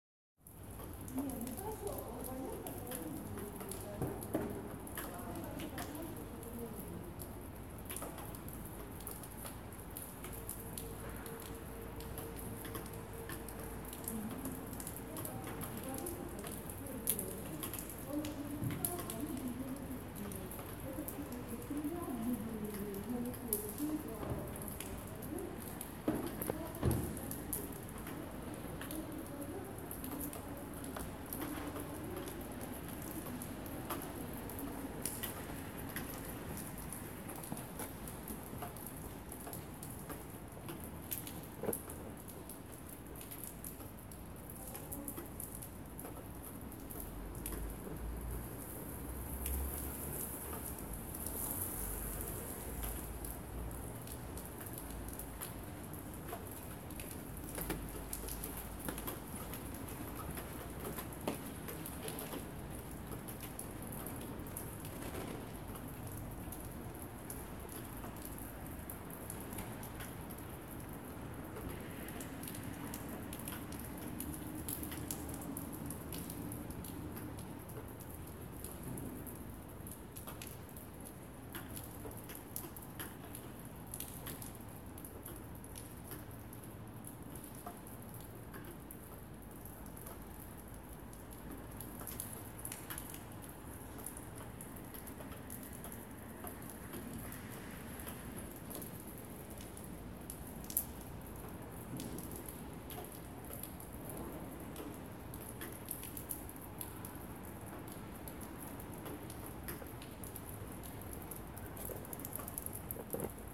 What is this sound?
Rain in Vienna, in the City live. On afernoon